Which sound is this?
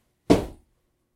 Feet Landing On Ground
The sound of a human's feet landing on a hard surface after a jump.
Surface, Feet, Hard, Land